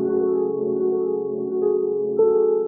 dist piano fragment 3
My Casio synth piano with distortion and echo applied. An excerpt from a longer recording.
delay, distortion, fragment, piano